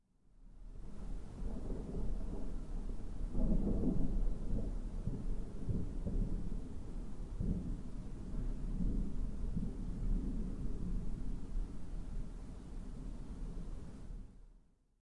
One of the 14 thunder that were recorded one night during my sleep as I switched on my Edirol-R09 when I went to bed. This one is quiet far away. The other sound is the usual urban noise at night or early in the morning and the continuously pumping waterpumps in the pumping station next to my house.
bed, body, breath, field-recording, human, rain, thunder, thunderstorm